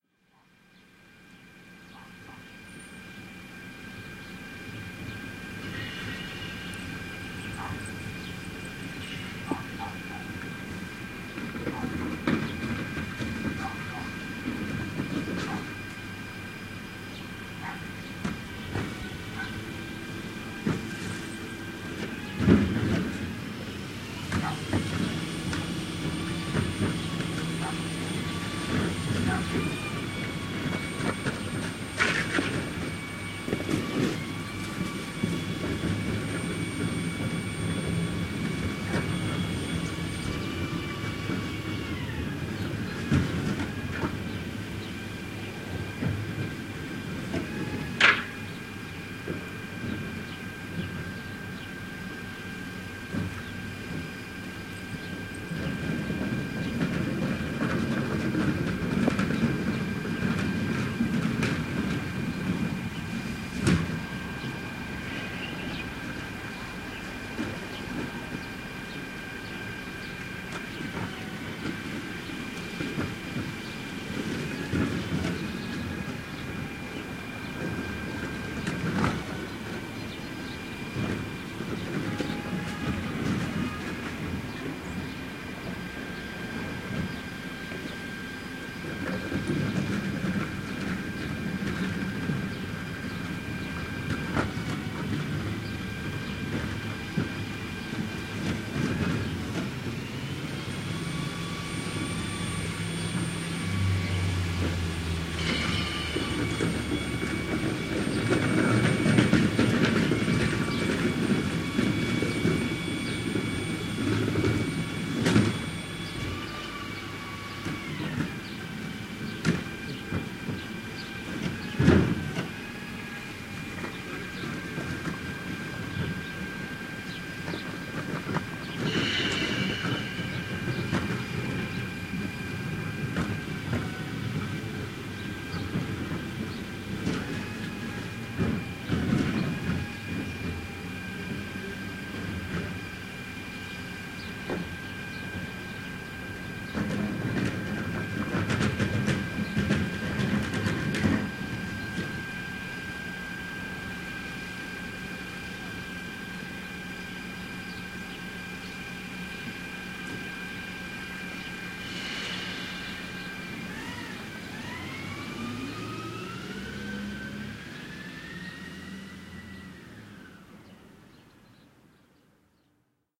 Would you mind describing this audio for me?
bin collection
The bin lorry came down our street the other day so I rushed outside to record it. It makes its way onto the street, and the workmen take the bins and bags out to be put onto the back of the lorry to be emptied. They are then emptied and taken back to where they were.
bin, bin-collection, birds, empty, field-recording, garbage, garbage-truck, lorry, plastic, rubbish, trash, truck, van